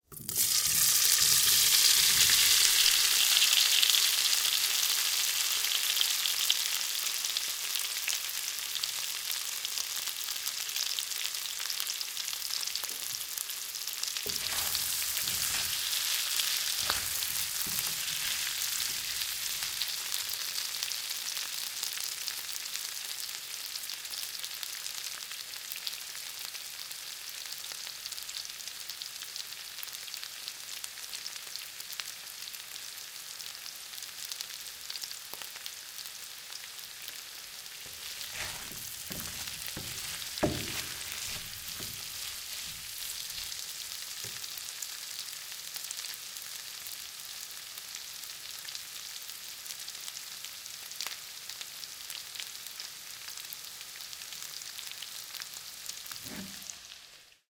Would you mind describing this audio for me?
Frying potatoes and stirring.
cook, kitchen, Frying, fry, food, oil, potatoes